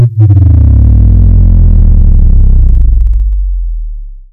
Bass Synth/Drop
A nice bass drop. A lot of people look for this sound, you're welcome. - Bryan
dubstep, boom, kick, drop, motion, synth, bass, slow